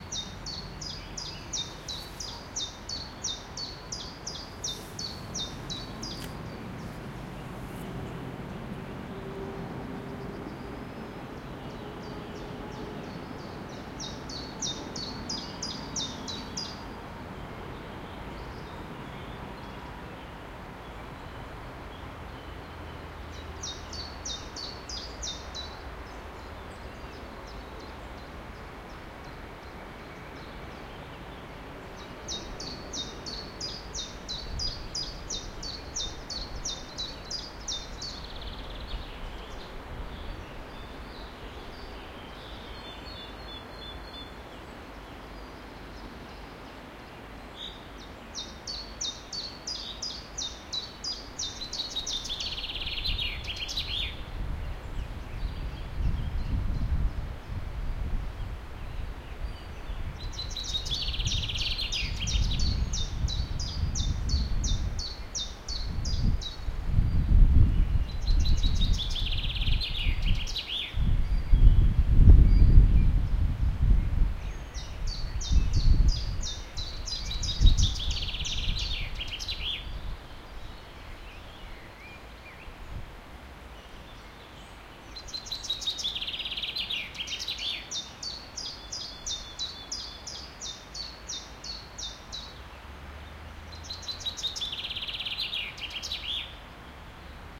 Morning forest near river and train 2
Sound of spring morning in forest. Birds are singing. A river flows nearby and a train runs in distance.